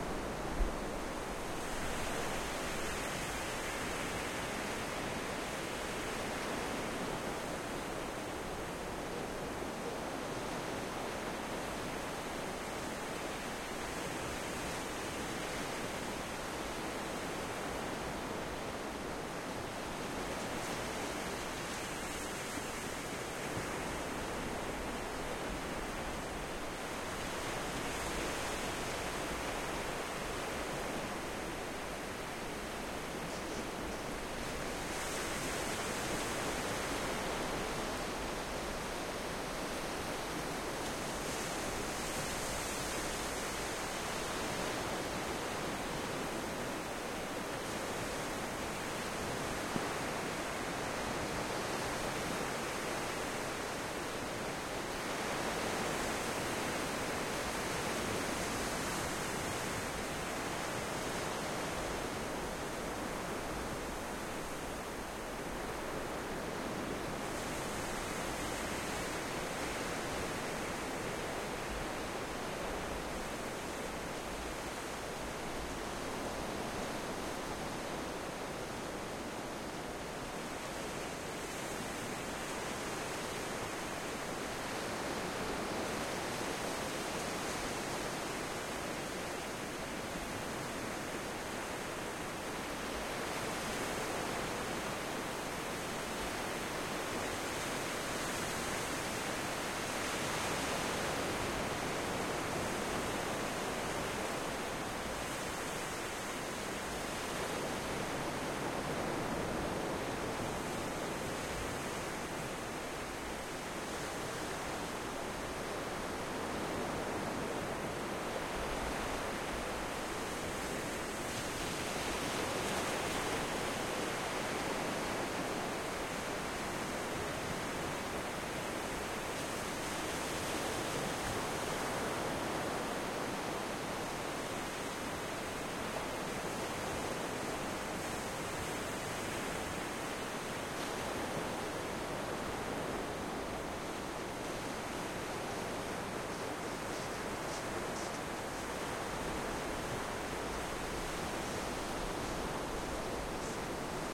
4StrongerWavesStereo2min30seconds
Close waves on English Channel pebble beach, Littlehampton.
waves, beach